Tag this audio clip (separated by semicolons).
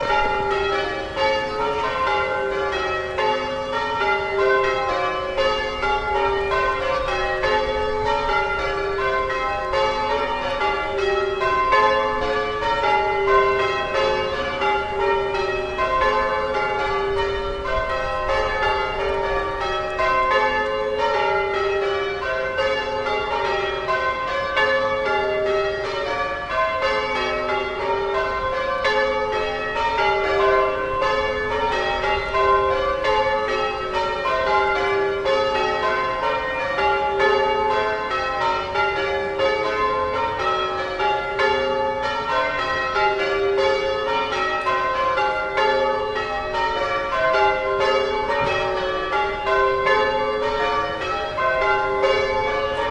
belfry; bell-ringing; bells; campanology; change-ringing; church; field-recording; service; worship